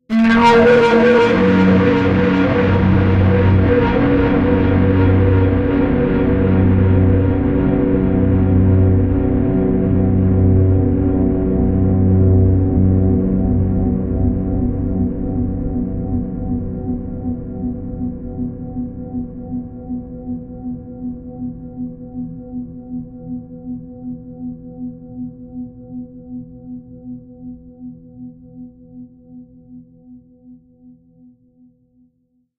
Hi everyone!
SFX for the scream moment in horror game or movies.
Software: Reaktor.
Just download and use. It's absolutely free!
Best Wishes to all independent developers.
ghost, fear